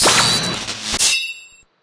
blizzard FX anime 80s cheesy 2
80s, anime, blizzard, cartoon, cartoonish, cheesy, fantasy, fx, processed, scifi